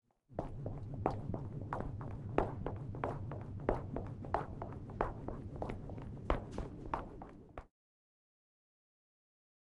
Processed footsteps, percussive.